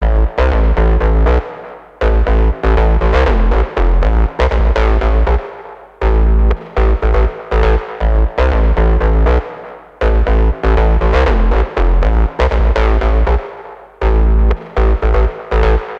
CENTAURI BASS
bass, Dub, dubstep, edm, effect, free-bass, LFO, low, sub, wobble, Wobbles